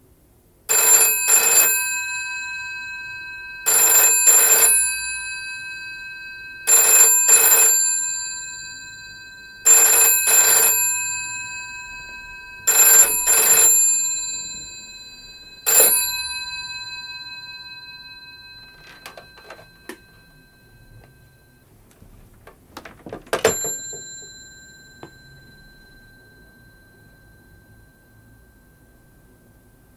Phone ringing 5 times then picked up UK GPO 746
A telephone ringing, the British UK 1970s model GPO 746. It rings 5 times and is picked up and hung up again.
British, GPO, Telephone, United-Kingdom, up